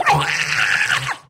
alien screecn 1
This is a sound effect of an undetermined 24 legged alien form with 2 mouths and a slimy attitude
alien, effect, sound, voice